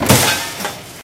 die single 1
die, industrial, machine, factory, field-recording, metal, processing
die, factory, field-recording, industrial, machine, metal, processing